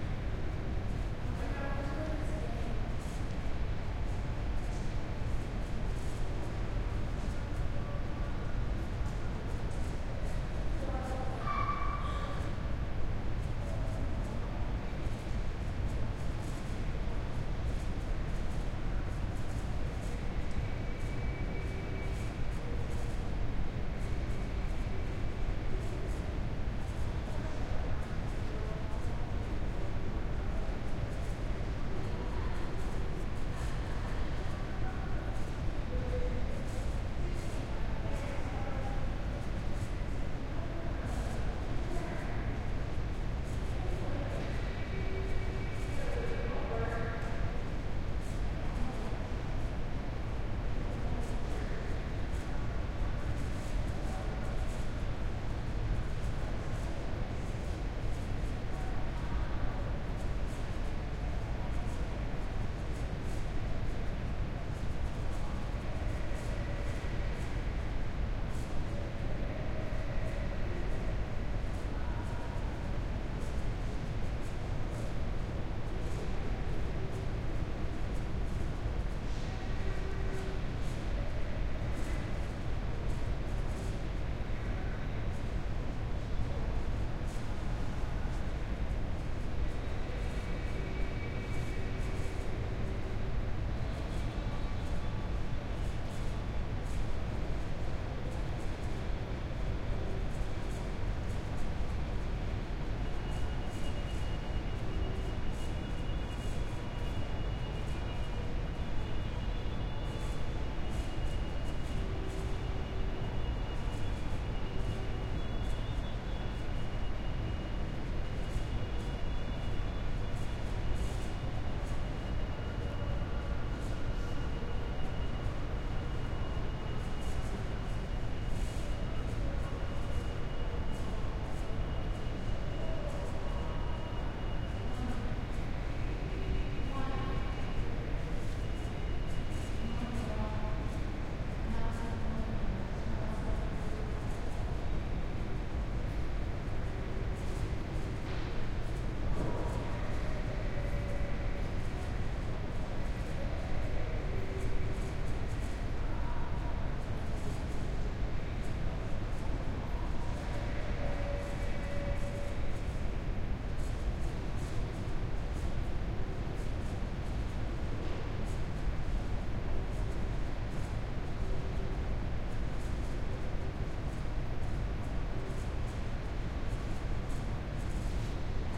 Mall, Vent, Vacant
A very quiet, almost deserted mall in the early morning. A nearby vent rattles. Distant music plays, and a few people talk.